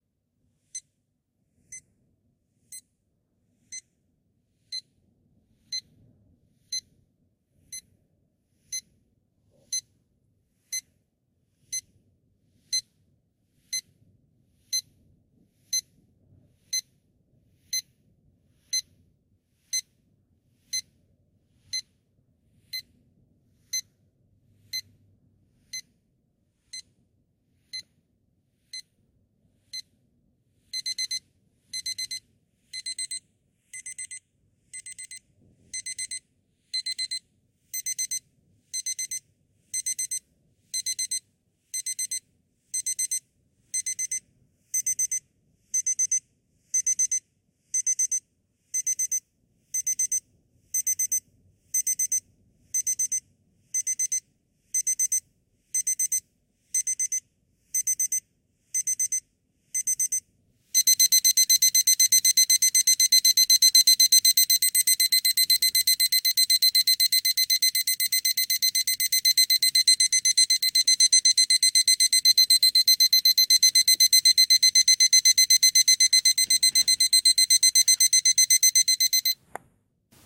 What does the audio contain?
Alarm2+NR
This version attempts to remove the recording noise from the iPod. My radio controlled projection alarm clock beeping loudly to wake you up! Starts off slow and then speeds up until I turn it off. Noise-reduced version also available.
alarm, alarm-clock, alert, beep, beeping, beeps, bleep, bleeping, bleeps, buzzer, clock, electronic, morning, wake, wake-up, warning